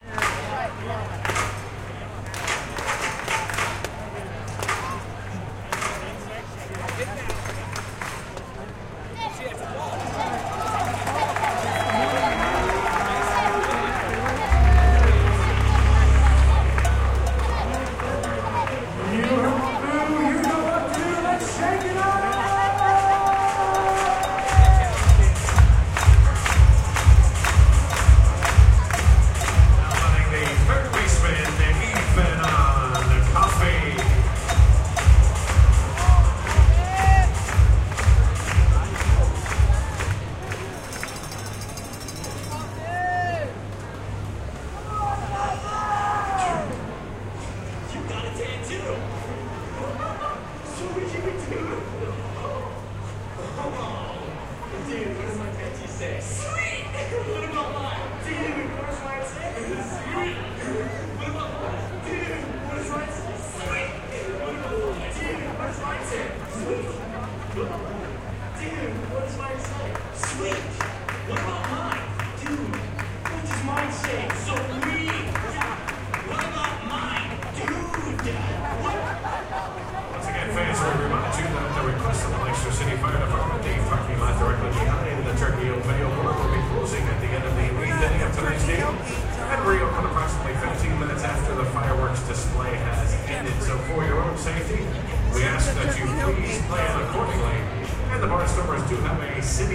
This begins in the middle of a rally with the crowd clapping in time. This is followed by a local dairy promotion, featuring a cow. There is the announcement of the next batter, then comes a segment from a comedy film on video. There are more announcements.